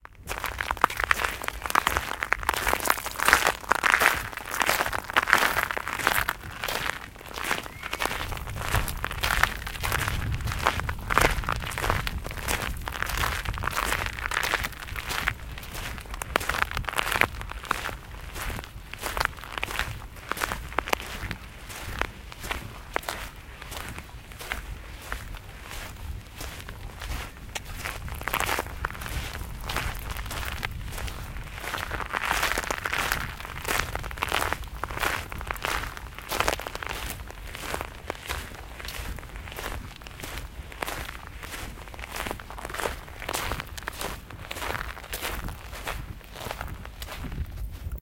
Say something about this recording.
Steps on Seashells
16 bit sound recorded with ZOOM H6 via the SSH6 mic extension back in August 2020. The beach and seashells are located in Norderney, Germany. I only increased the volume of the recording without any other processing. Some parts of the sound are cleaner than others - in some cases there are bits of wind noise, birds.. I can say that this sound is good foundation material for processing.
footsteps, seashells